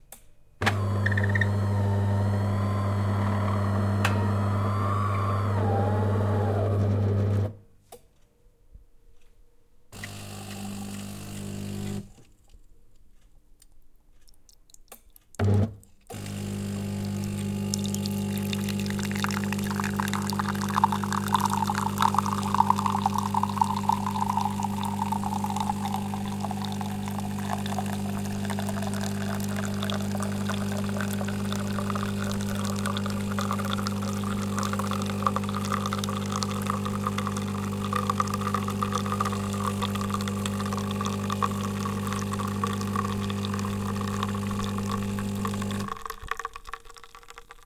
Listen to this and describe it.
Výroba kávy, přetékání do hrnečku.